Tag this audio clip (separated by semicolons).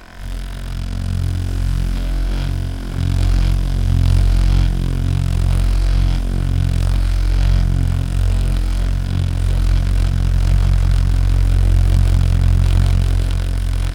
buzz; cloth; machine; massage; mechanical; stereo; vibrations; vibrator